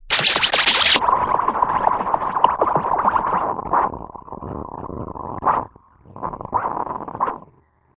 big bug bent